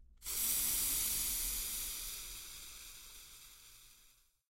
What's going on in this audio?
Sonido de un aerosol
Sound of a spray/aerosol